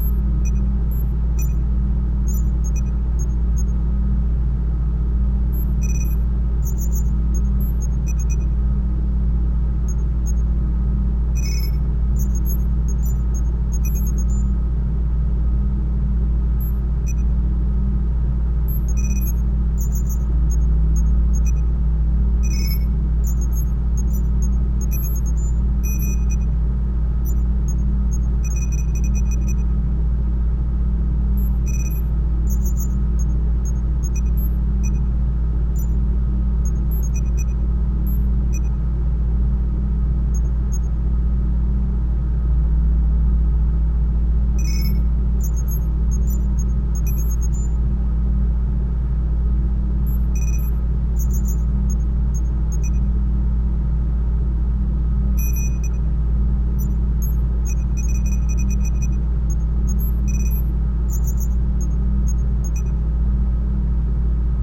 Ambient sound akin to the bridge of a starship.
Muted background hum with an overtone of air conditioning ducts. Pseudo-random high pitched blips occupy the soundstage.
Could be used as an ambient sound loop for hi-tech / science fiction interiors such as a laboratory, a control room, or the bridge of a spacecraft.
Created with two instances of FLStudio 3x Osc. One kicking out a pair of sinewaves plus noise for the background susurus (hum) and ventilation sound. Second 3x osc is set to produce two interfereing square waves for the blips, squeaks and buzzes. The background was notch filtered and bandpassed to mellow out the humming sound and reduce hiss. The blips were processed with FLStudio EQUO, stereo enhance, Fruity Delay2 and FL Pan-o-matic VST. Each blip was pasted by hand in a seemingly random but not too unpleasant pattern.
electronic, sci-fi, interior, drone, atmosphere, computers, ambience, ambient